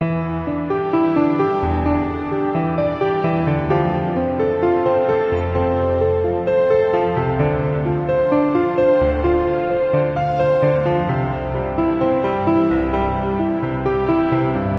Short piano loop i made in FL-studio.
Nothing special, just me being bored again, thinking i can come u with a tune.
fl-studio, loop, piano